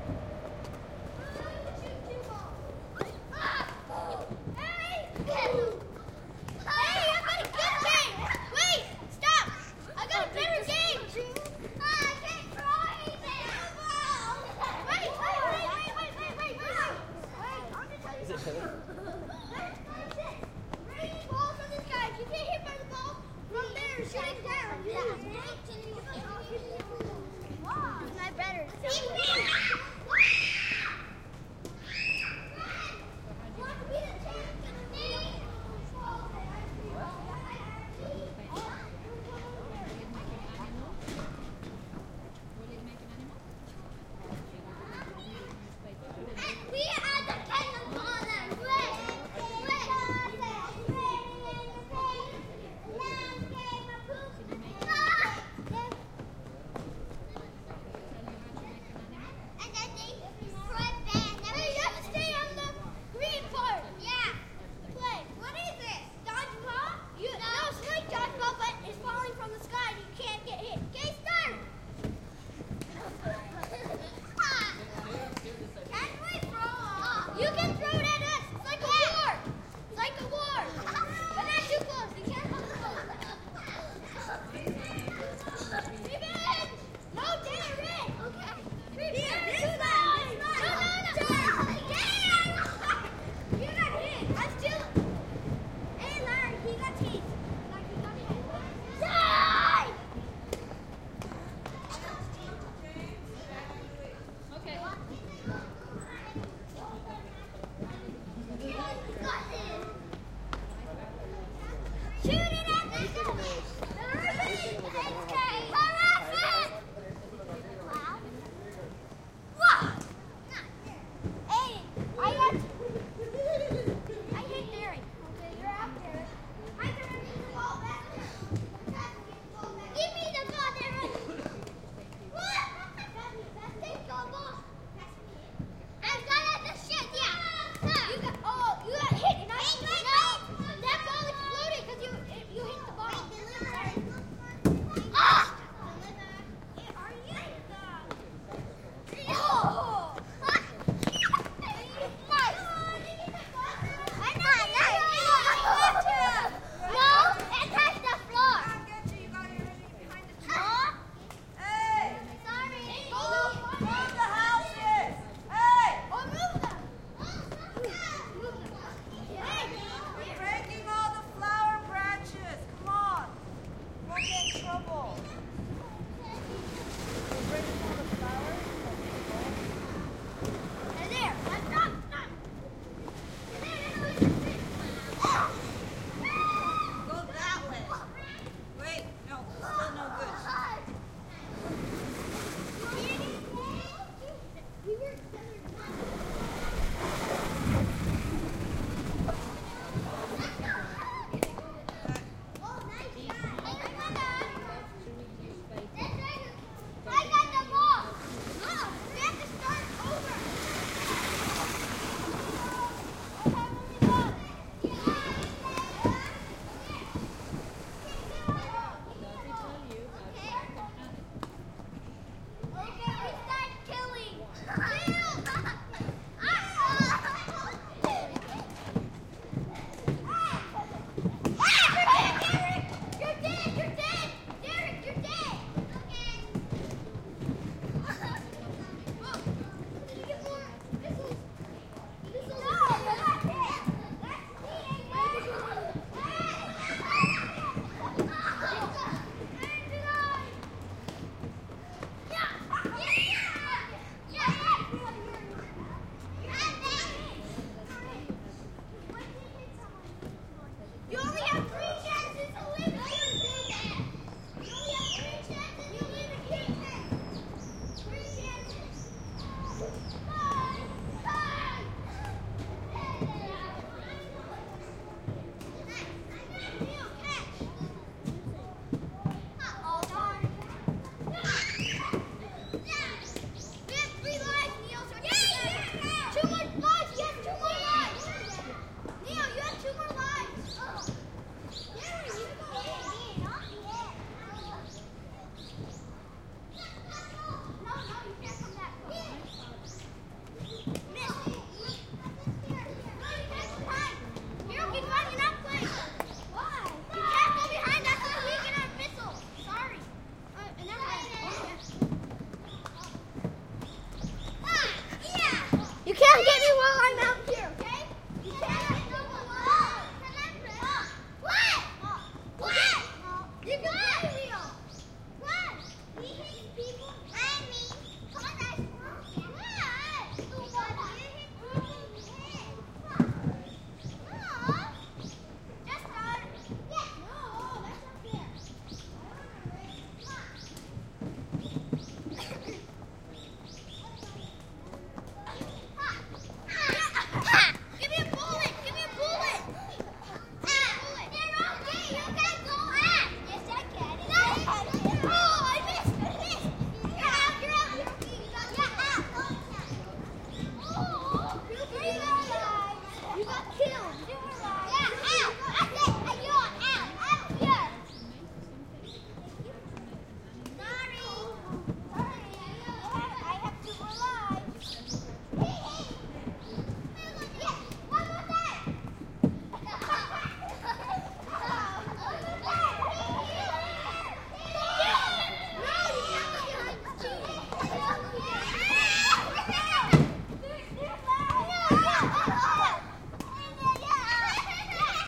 Kids playing at recess at an international school in Tokyo. They mostly speak in English but you can hear some Japanese from time to time.